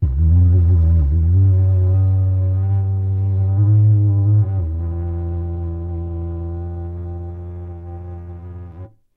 Cardboard tube from Christmas wrapping paper recorded with Behringer B1 through UB802 to Reaper and edited in Wavosaur. Edit in your own loop points if you dare. Low, loose lipped version.